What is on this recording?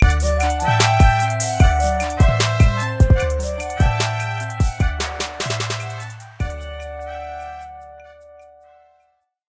Fading intro, slight syncopation, 5 synth parts